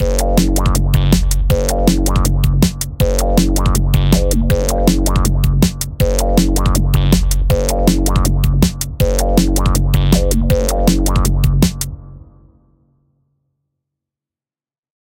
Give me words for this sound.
Dark, acidic drum & bass bassline variations with beats at 160BPM